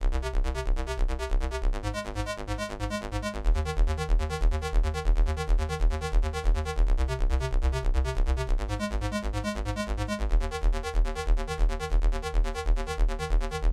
Bass-Middle
A bassline I created from synthesizing a simple bassline.
sampled, Arpeggiator, sample, mellow, bassline, trance, effects, synthesizer